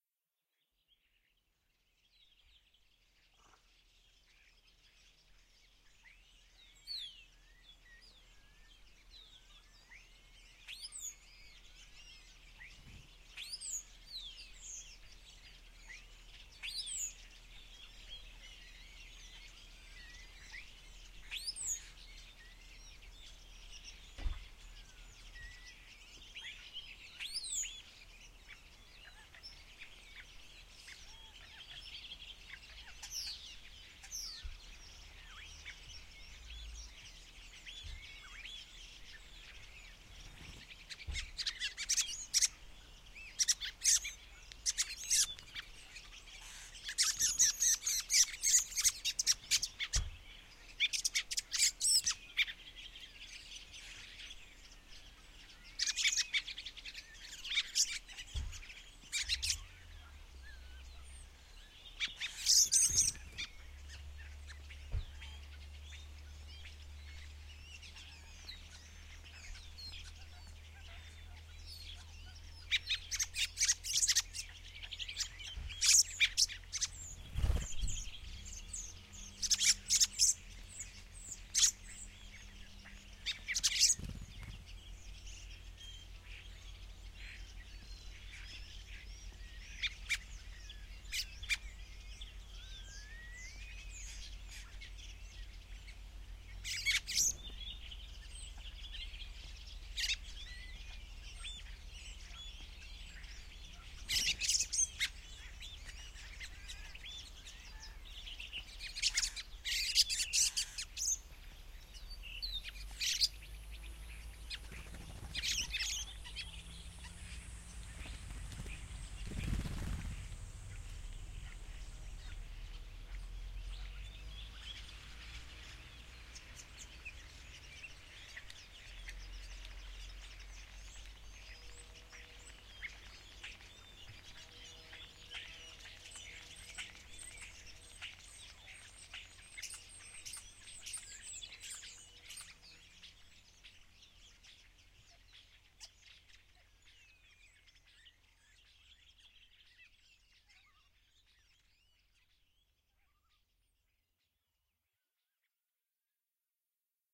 arguing birds
Birds (mostly thrushes) arguing over food on a snowy but sunny day in Reykjavik, Iceland.
thrush, reykjavik, iceland, suburb, winter